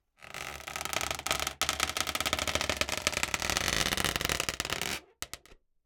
Balloon Creak Long Twist 7
Recorded as part of a collection of sounds created by manipulating a balloon.